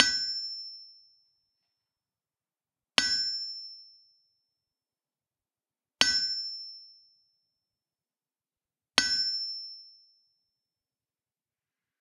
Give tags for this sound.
labor; anvil; impact; metallic; 4bar; work; smithy; crafts; blacksmith; 80bpm; metalwork; forging; lokomo; iron; steel; metal-on-metal; tools

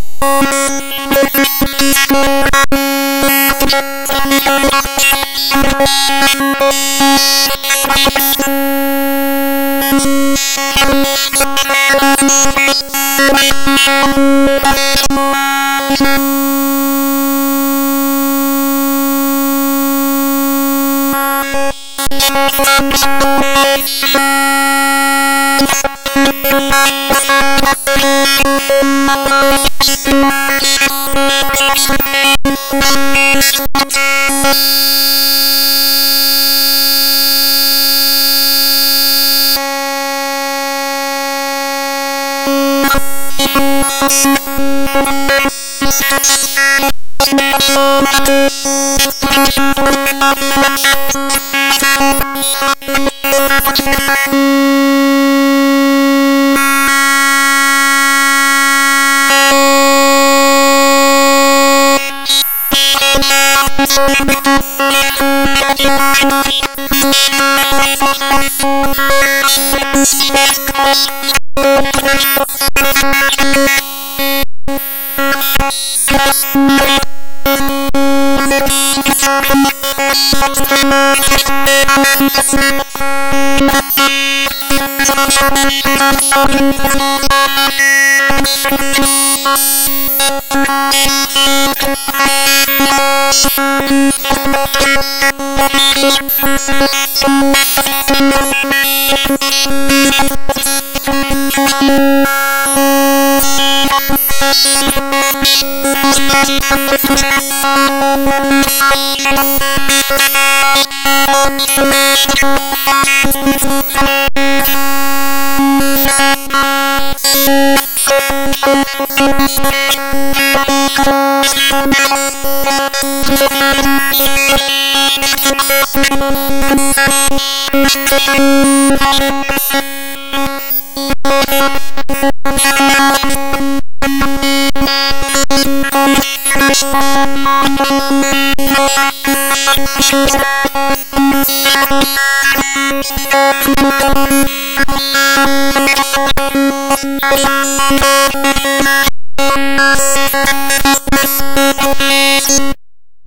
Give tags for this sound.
signal; digital; electronic

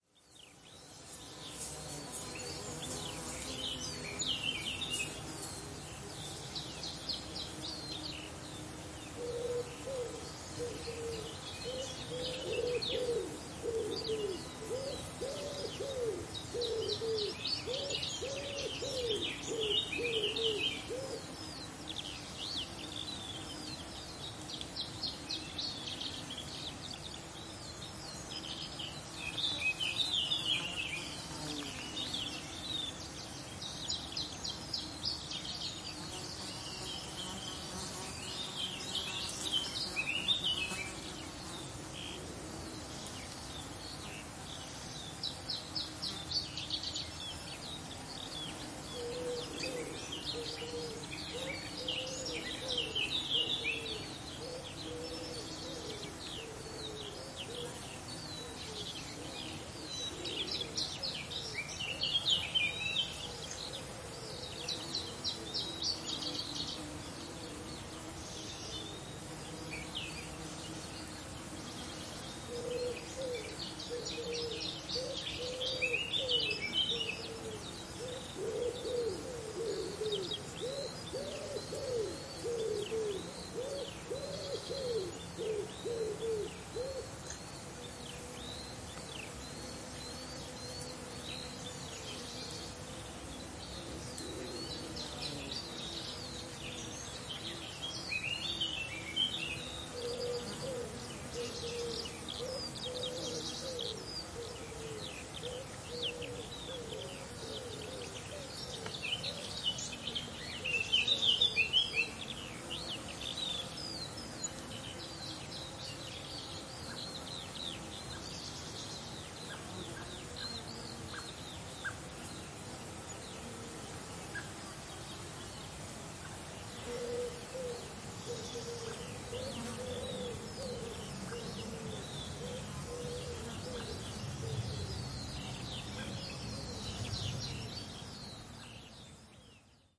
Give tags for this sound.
ambiance; birds; field-recording; forest; insects; nature; summer; wood-pigeon

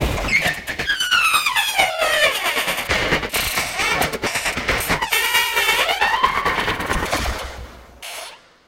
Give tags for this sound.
old-door-drum-loop; beat

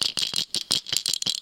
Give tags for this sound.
ball; toy

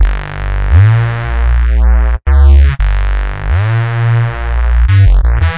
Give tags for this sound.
172; Neurofunk; Bass; Synth; Sample; G

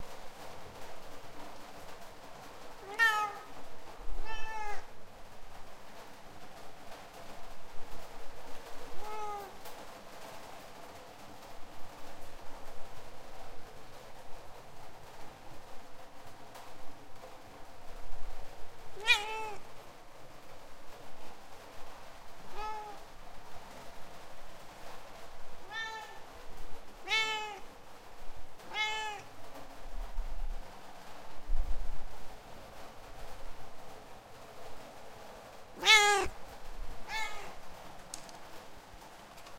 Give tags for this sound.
animal; cat; feline; meow; noise; rain

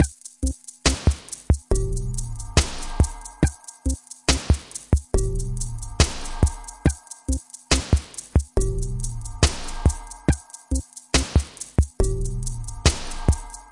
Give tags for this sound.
Drum; Electronic; Beats; Tekno; Native-Instruments

